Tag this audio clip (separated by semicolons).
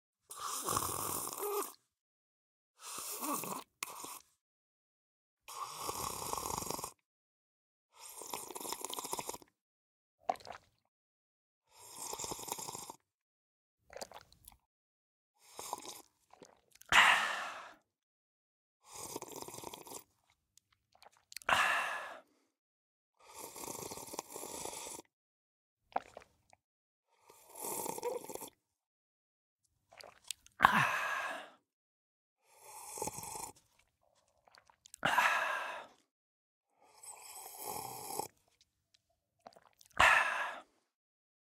Gulg; shotgun-mic; Slirpping; universal-audio